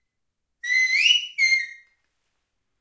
Turn Round-Look Back
A stereo recording of a sheepdog whistle command.Turn round means that the dog has missed some stock and needs to retrieve them. Rode NT4 > FEL battery pre-amp > Zoom H2 line in.
sheepdog, stereo, whistle